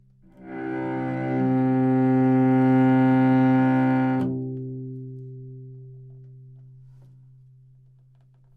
Cello - C2 - other
Part of the Good-sounds dataset of monophonic instrumental sounds.
instrument::cello
note::C
octave::2
midi note::24
good-sounds-id::275
dynamic_level::p
Recorded for experimental purposes
good-sounds, C3